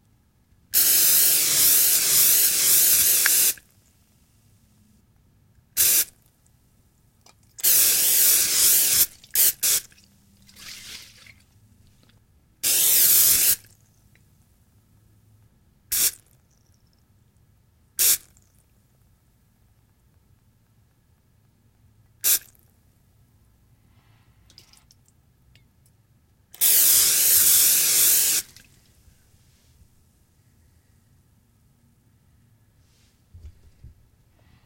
Spray Can
Several variations of aerosol can sprays.
Pan, Graffiti, Aerosol